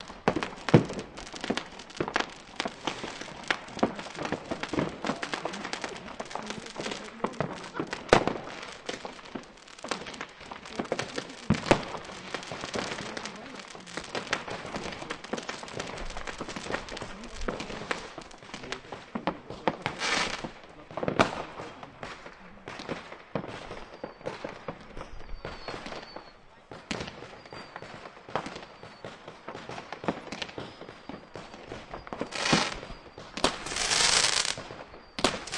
people enjoying fireworks on new year's eve on the north sea island of langeoog. recorded with a zoom h2 recorder.
field-recording, fireworks, germany, langeoog, new-years-eve, people